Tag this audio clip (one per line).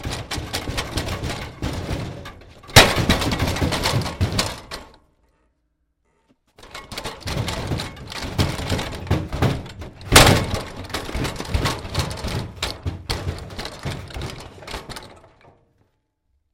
metal
rattle
rollcage